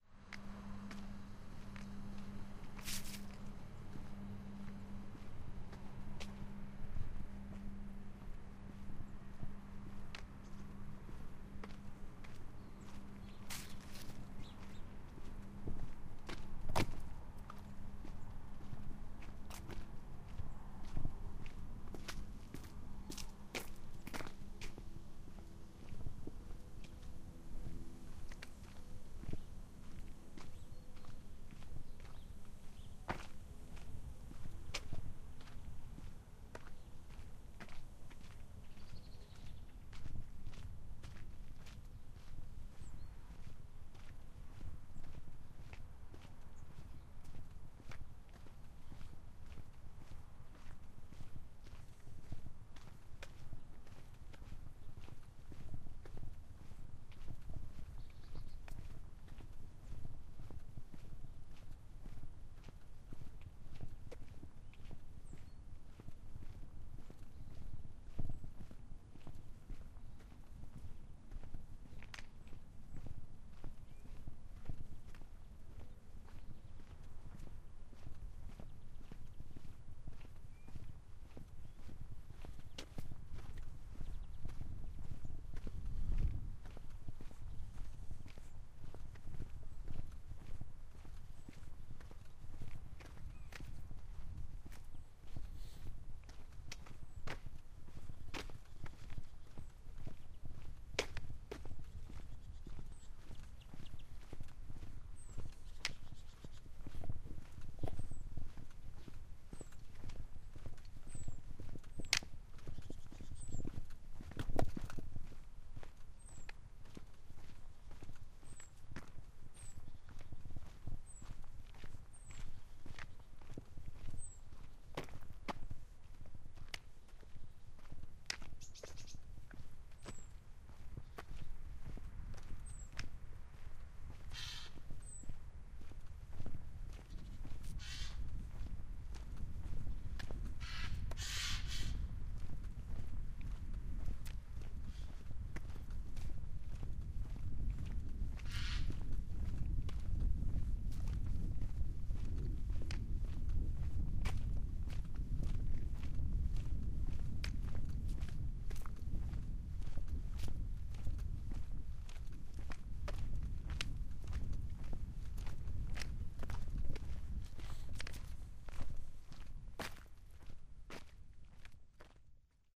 Hinaus in die Felder
A walk away from my house an up the village street to the fields. It's kinda windy, but my Zoom H2 gives his best.